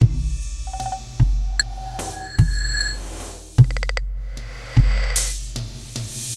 digi cow
Here is a short loop,made from a few of my synths and a few pots,spoons...